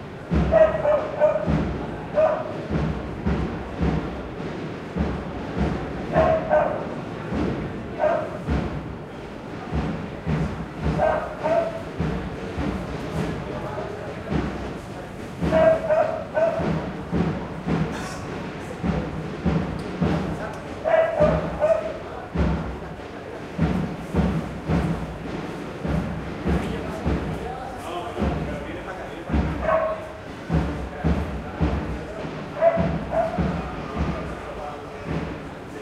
20060718.drum.n.bark
street ambiance with a marching band that goes away, people talking and a dog barking. Recorded from my balcony with Rode NT4 on iRiver H120
drum, marching-band, field-recording, ambiance, city, dog, barking